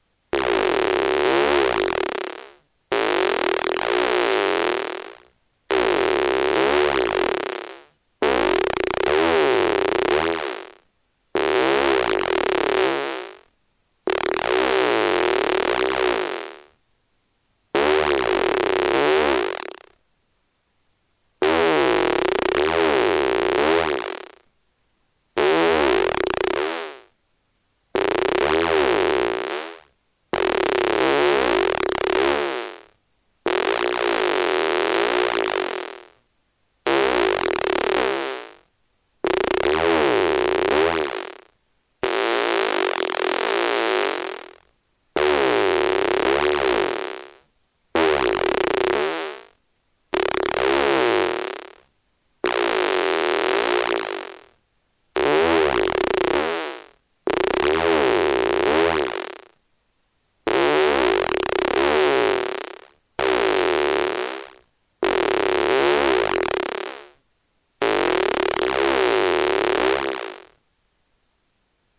25 psy farts
25 of those squelching farting sounds you hear in psytrance. Each one is a little different.
Made with NI Massive, and a bit of processing in adobe audition.
fart, psy, psychedelic-trance, psytrance, squelch